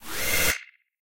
Space Drill
fast drill sound with space feel
click, game, button, gui, flourish, bleep, event, short, fi, sfx, sci, blip